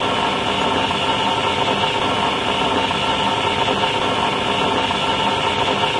I streched my viola strings with my bow, recorded the sound, and layered it and granulated it
morgado, tiago, morais, viola, strings, stretched, layering, synthesis